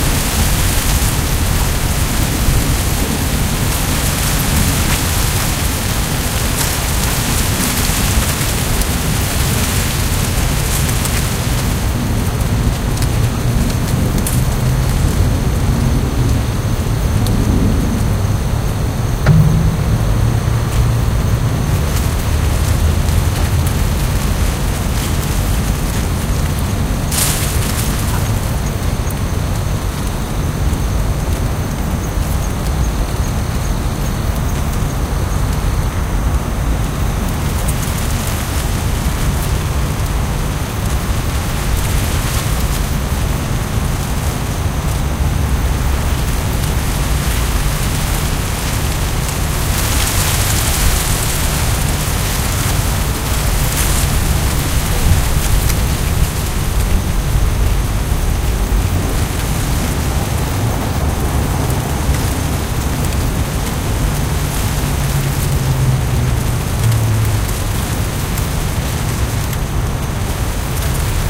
Stereo recording made near the Edogawa river in Matsudo, Chiba, Japan. I simply put the Zoom H2n Recorder (MS-Stereo mode) in front of some reeds, on an early october afternoon.